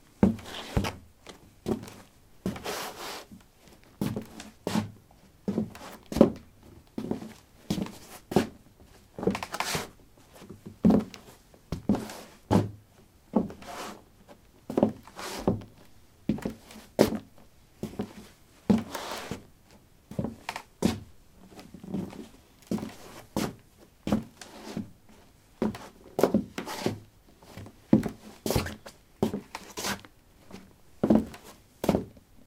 wood 14b lightshoes shuffle
Shuffling on a wooden floor: light shoes. Recorded with a ZOOM H2 in a basement of a house: a large wooden table placed on a carpet over concrete. Normalized with Audacity.
footsteps, step, steps, footstep